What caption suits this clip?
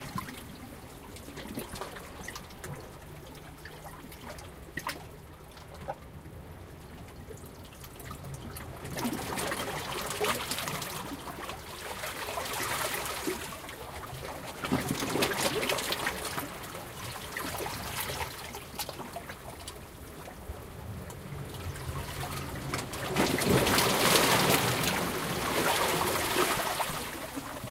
Taken with Zoom H2N, the beaches of Cyprus